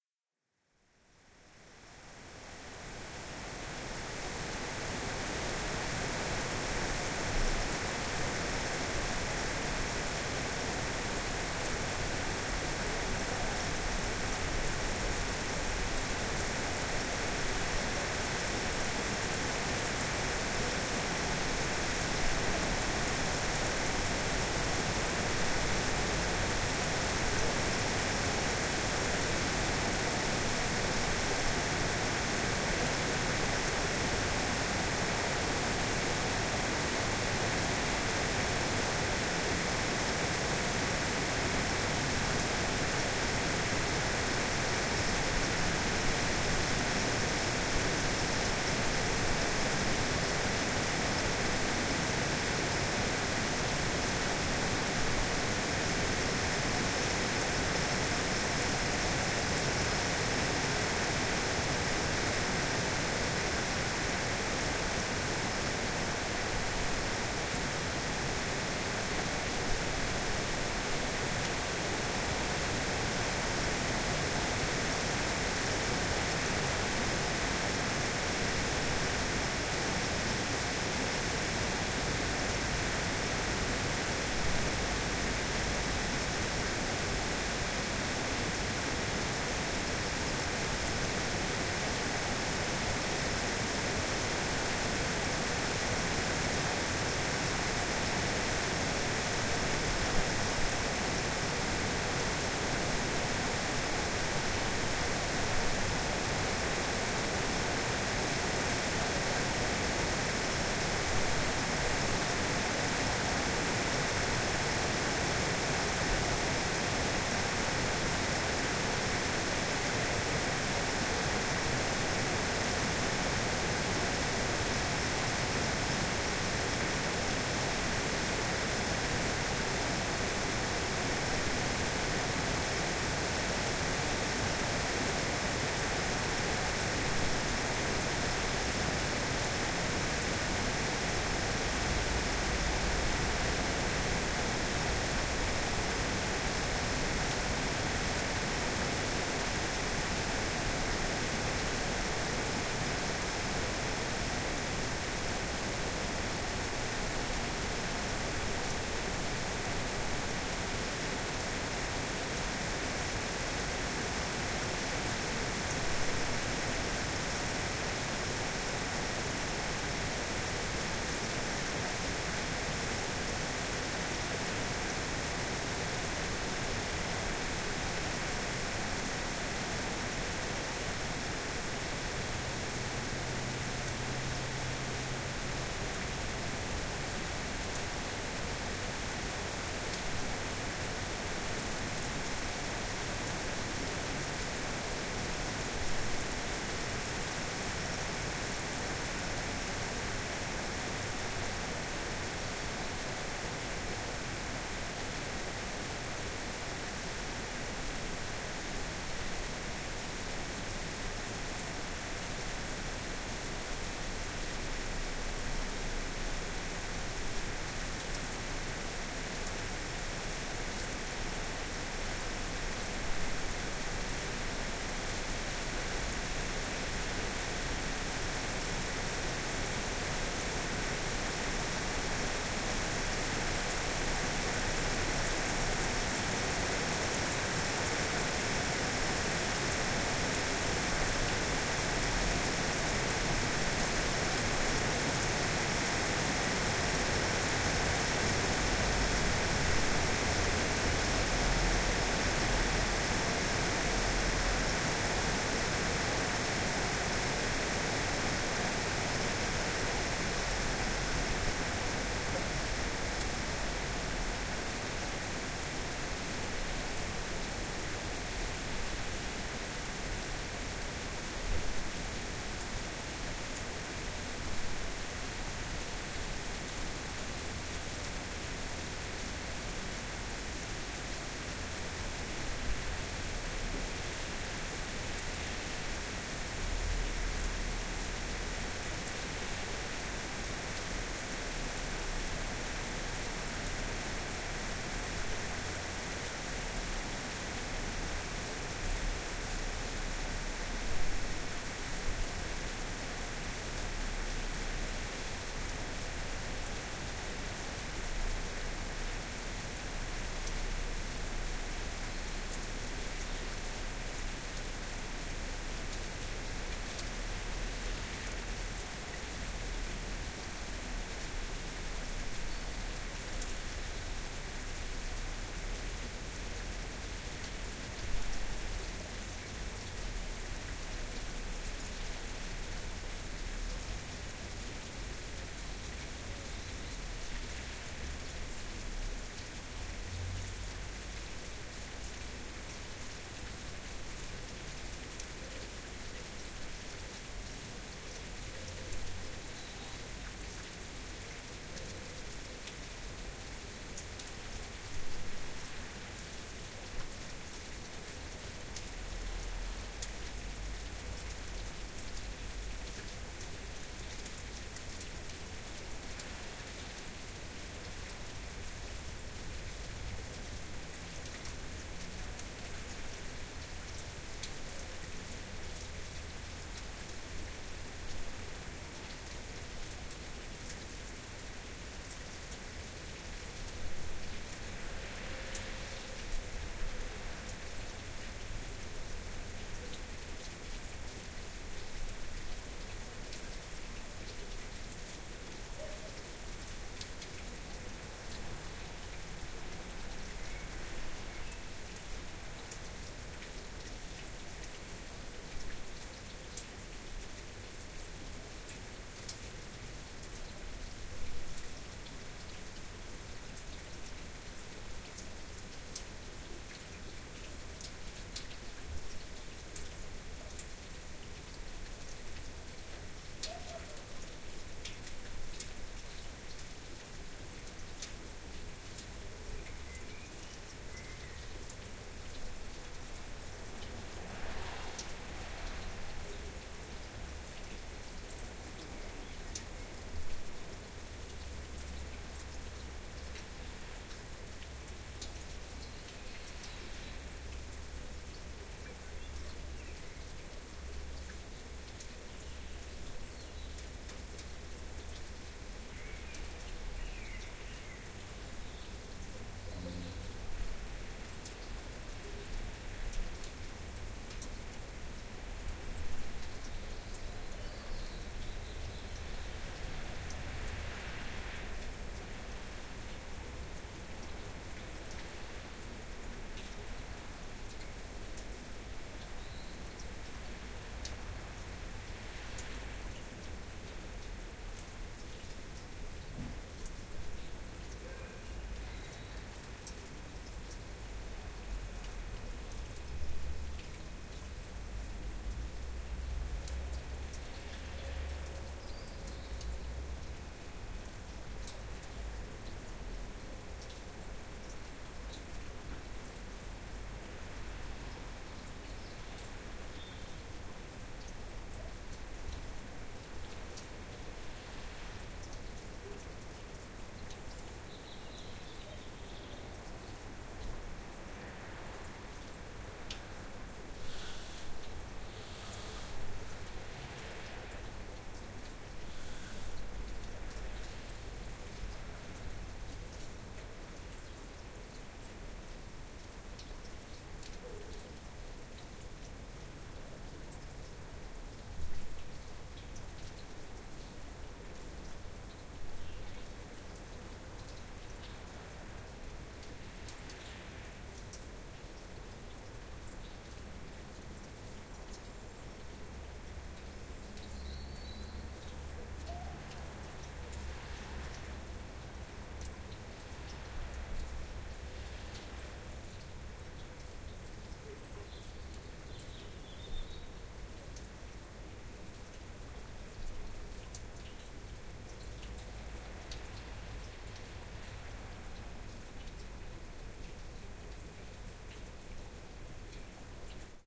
June 2018 shower, slowly ceasing
Summer rain slowly ceasing — as recorded from the window. Summertown, Oxford. June 2018
rain, window